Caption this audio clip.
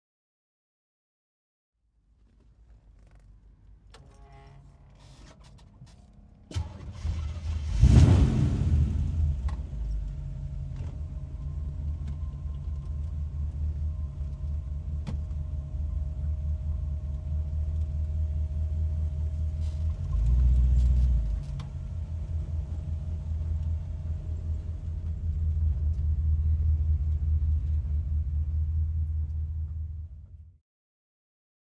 1950 Ford Mercury interior ignition and slow cruise
Recorded on Zoom H4N with Rode NTG-3.
The sound a vintage 1950 Ford Mercury car with v8 engine starting up and cruising slowly recorded from inside.